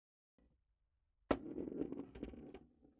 A plastic ball falling on a wooden floor